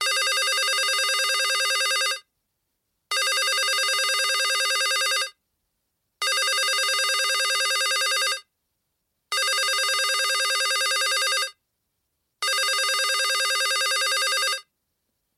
Cellphone ringtone
Model: Huawei Y6
Recorded in studio with Sennheiser MKH416 through Sound Devices 722
Check out the whole pack!